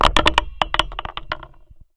Tube Rock Rattle
Tossed a rock into an unused large PVC sewer drain laying on a construction site.